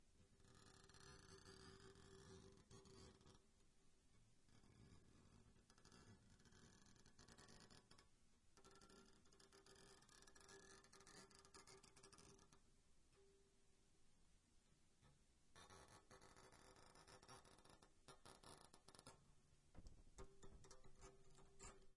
Inside,Piano,String,Scratch,Keys,Rattle-01
Part of a series of various sounds recorded in a college building for a school project. Recorded with a Shure VP88 stereo mic into a Sony PCM-m10 field recorder unit.
field-recording, school, sfx